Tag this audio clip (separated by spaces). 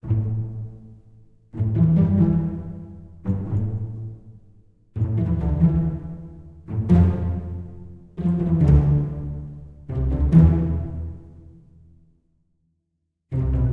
cinematic orchestra strings pizzicato viola orchestral ensemble classic